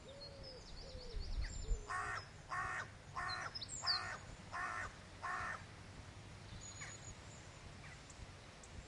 birds-incl-woodpigeon-crow
Crow recorded during a summer walk, other birdsong in the background, most obviously woodpigeon. Binaural recording on a Zoom H1.
birds, crow, midsummer, pigeon, summer, wood-pigeon, woodpigeon